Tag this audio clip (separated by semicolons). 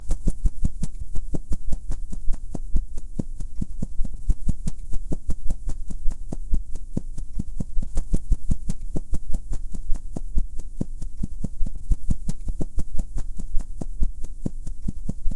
wings; wing-flaps; bird; flapping; flying; simulated